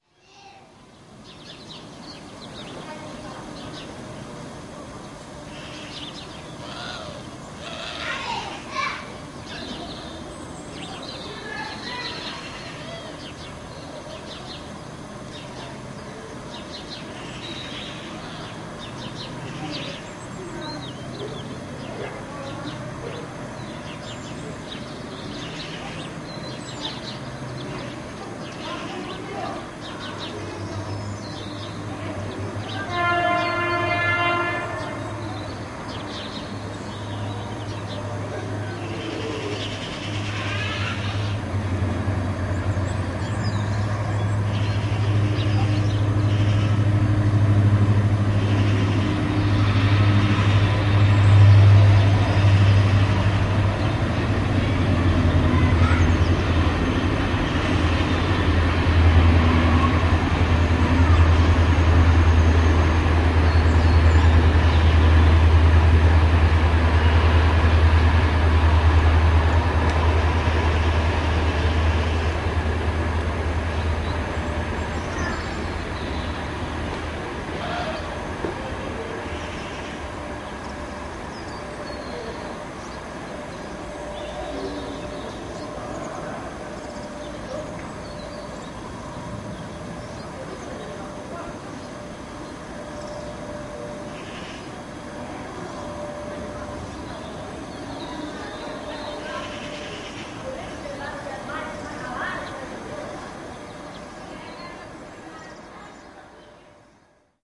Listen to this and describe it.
Birds sing and children play while cross a train.